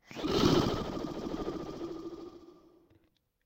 propeller-Pitch
Recorded some r:s with a Sennheiser K6, did some time adjusting and pitching. We needed a sound of a propeller falling off a plane for a short animation for kids. In collaboration with Ljudman.
comic, passing-by, propeller